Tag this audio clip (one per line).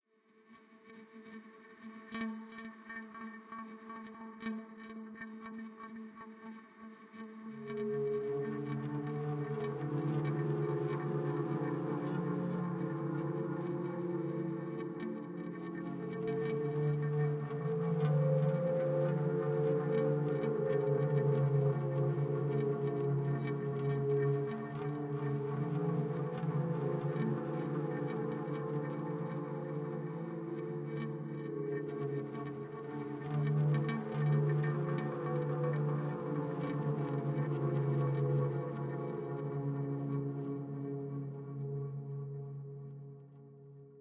ambient
pad